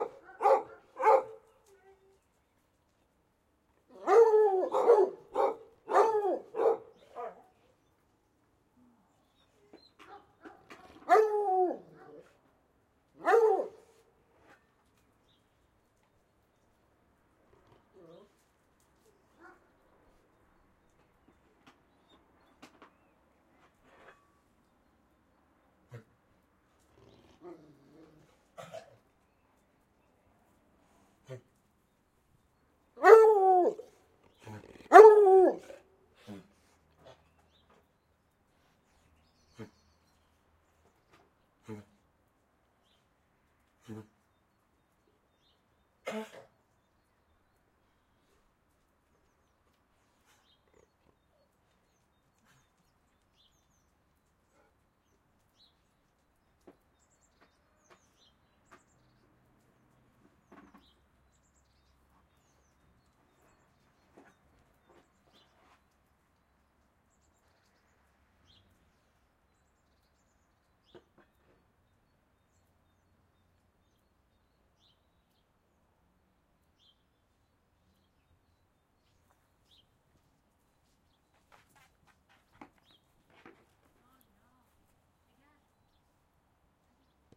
This was recording near a dog crate with dogs
barking, growling, woof, dog, animal, outdoor